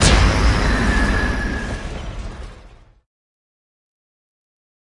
Explosion Droll
Perfect for bringing the ultimate immersion into glorious space adventures!
A collection of space weapon sounds initially created for a game which was never completed. Maybe someone here can get more use out of them.
explosion, neutron, particle, phaser, pulse, quark, space, torpedo, weapon